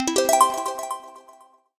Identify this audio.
An uplifting synth jingle win sound to be used in futuristic, or small casual games. Useful for when a character has completed an objective, an achievement or other pleasant events.